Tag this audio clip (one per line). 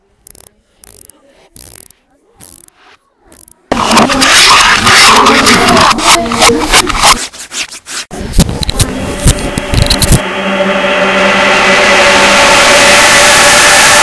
January2013
SonicPostcards
Essen
Germany